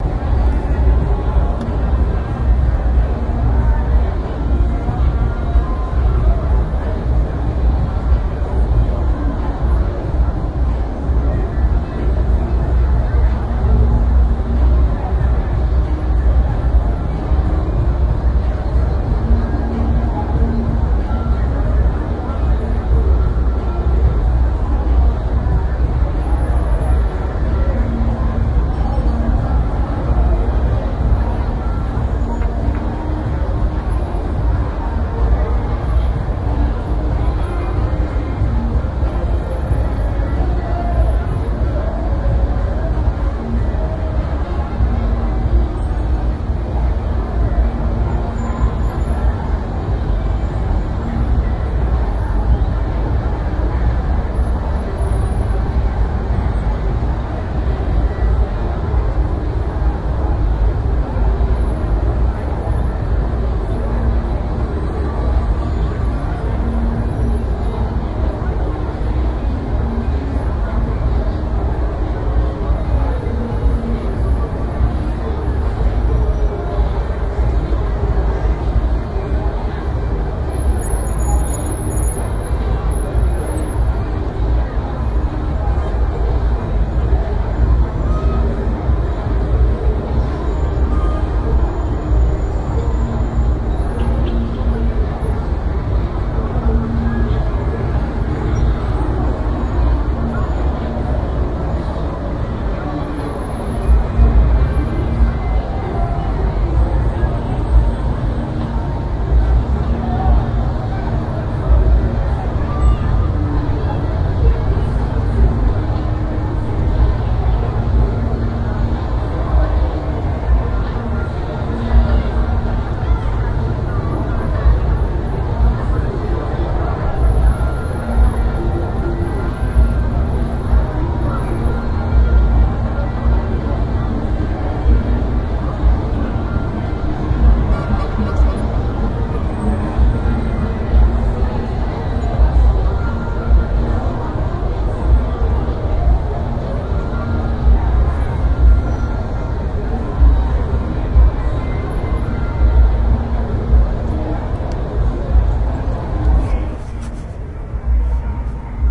San Diego's Gaslamp district, summer, night, night clubs pounding, thick crowds, all recorded from high above, so there's a lot of dense, natural reverb. The track has various qualities, moods, and sounds as it goes along. Stereo mics.